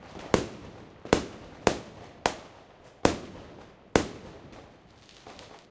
delphis FIREWORKS LOOP 03 ST
Fireworks recording at Delphi's home. Outside the house in the backgarden. Recording with the Studio Projects Microphone S4 into Steinberg Cubase 4.1 (stereo XY) using the vst3 plugins Gate, Compressor and Limiter. Loop made with Steinberg WaveLab 6.1 no special plugins where used.
ambient, c4, delphis, explosion, fire, fireworks, s4, shot, thunder